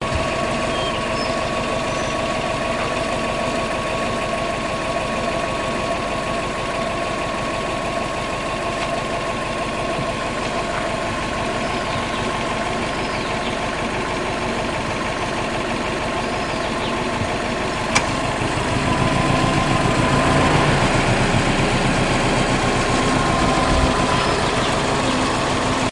Subaru forester - Engine sound
Engine sound Subaru forester
auto, car, driving, motor